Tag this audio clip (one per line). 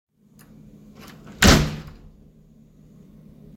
close
door
door-close
shut
slam